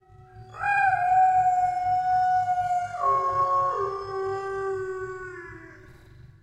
Processed Exuberant Yelp Howl 2
This is a processed version of the Exuberant Yelp Howl in my Sled Dogs in Colorado sound pack. It has been time stretched and pitch shifted. The original sound file was the happy cry of an Alaskan Malamute. Recorded on a Zoom H2 and processed in Peak Pro 7.